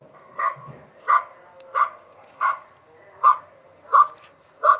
sonido perro grabado en calle